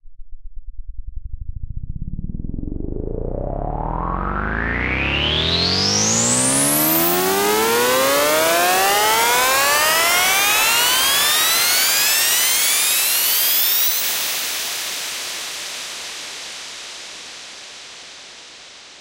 Riser Pitched 04b
Riser made with Massive in Reaper. Eight bars long.
dance, edm, percussion, synth, techno, trance